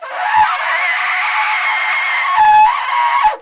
Howie Smith's elephantine recreation on the alto sax.
howie, elephant, sax, smith